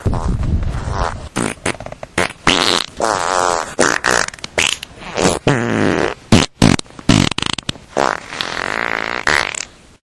many farts
fart, flatulation, gas, poot